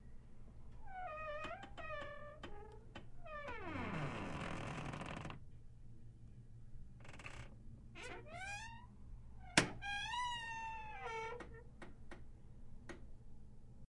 creaky hotel bathroom door. recorded on HDR with Sennheiser shotgun mic
creaky, door, hotel